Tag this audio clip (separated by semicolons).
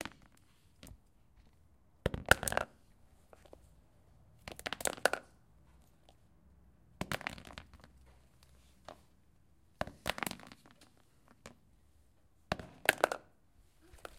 2014; doctor-puigvert; february; mysounds; sonsdebarcelona